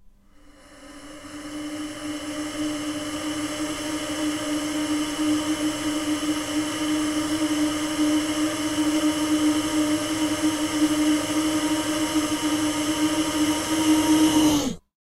brth solo2 tmty3 rev
Just some examples of processed breaths form pack "whispers, breath, wind". This is a granular timestretched version of the breath_solo2 sample.